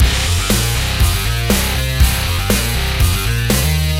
Quick little thing made in logic pro X with a custom synth guitar and the smash kit.